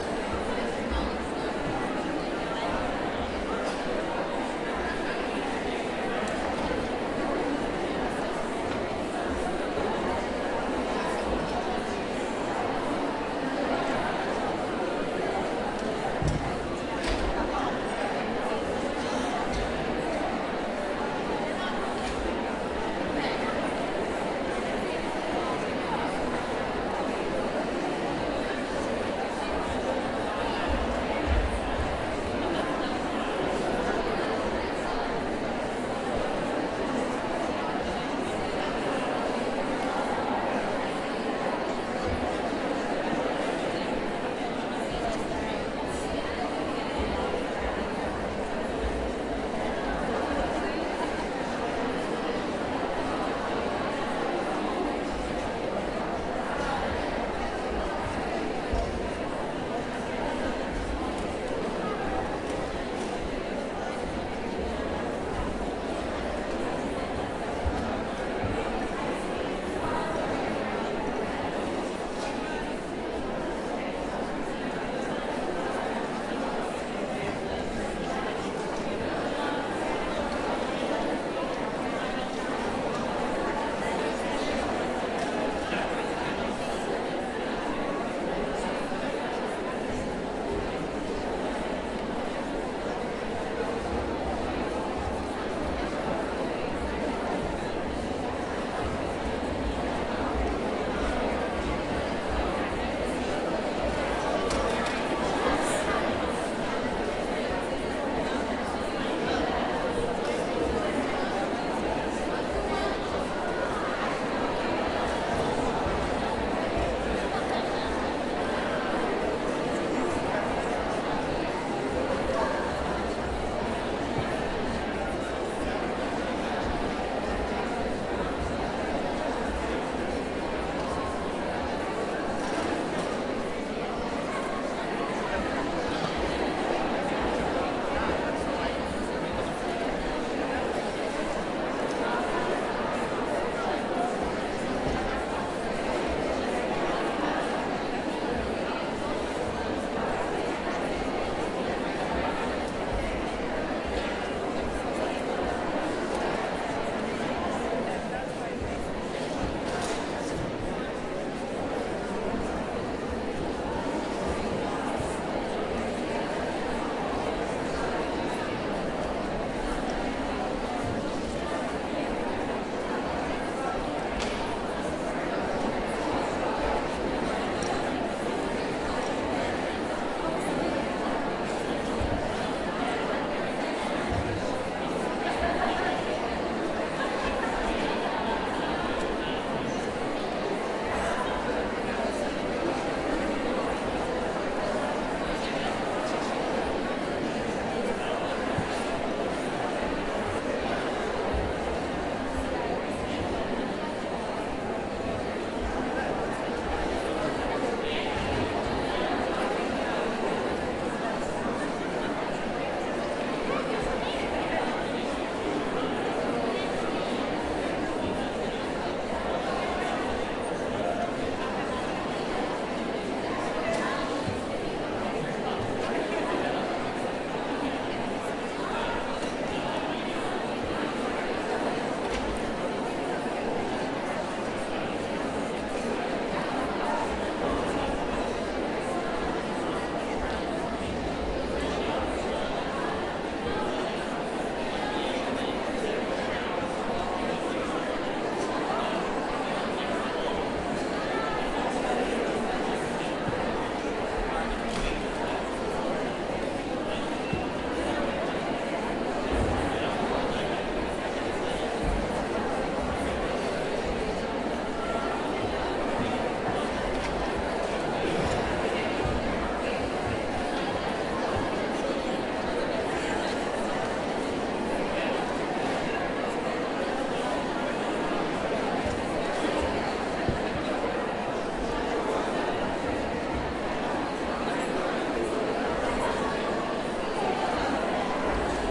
STE-010 crowd noise 2
Recorded from a balcony above one of the theaters at Parco della Musica auditorium in Rome as people start to take their seats for a conference. This time there are way more people in the room.
theater, theatre, voice, walla, talking, field-recording, crowd